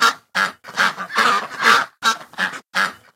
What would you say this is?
Recording of ducks